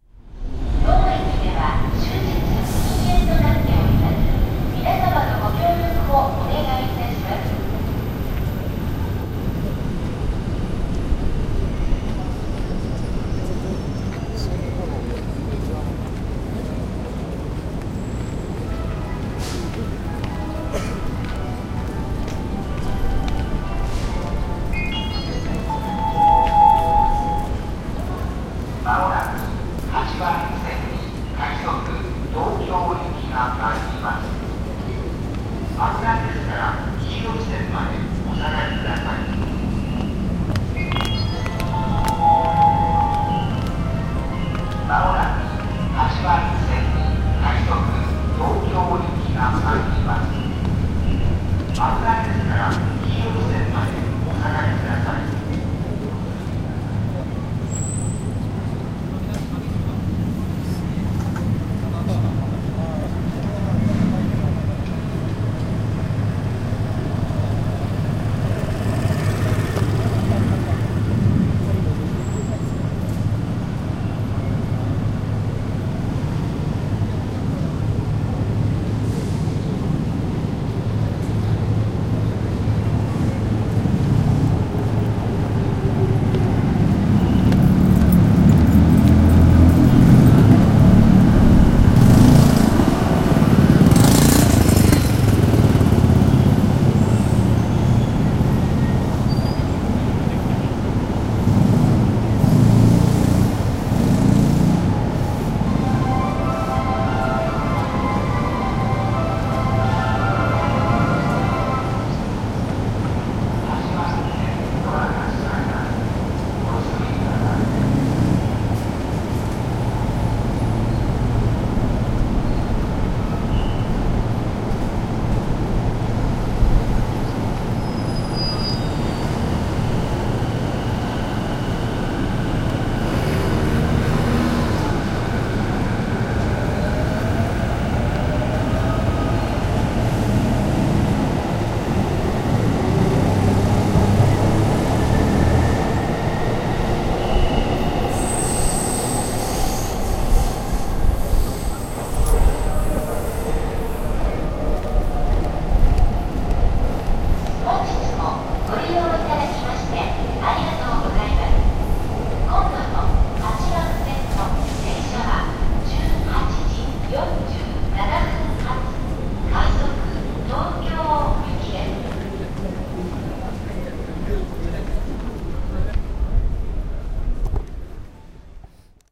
nakano station kitaguchi mae 90stereo
Plaza in front of a typical crowded Tokyo Station. I recorded this in the evening in front of Nakano Station's North Exit (in front of Nakano Broadway). Trains come and go and lots of people come in go for shopping and partying. I used a stereo pair of mics at 90 degrees.